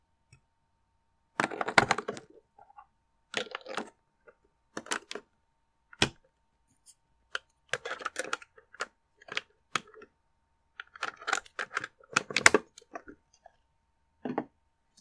putting in batteries
me putting new batteries in my alarm clock. Recorded and edited in Audacity.
Bizinga